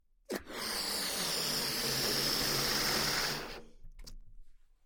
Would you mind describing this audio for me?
Balloon Inflate 3
Recorded as part of a collection of sounds created by manipulating a balloon.
Balloon, Blow, Breath, Machine, Plane, Soar